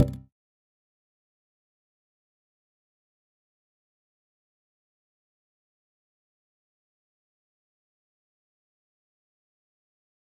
UIEnter/Advance
A UI sfx made to indicate a user has canceled an action or exited a menu. Made in LMMS.
cancel, exit, META, stop, UI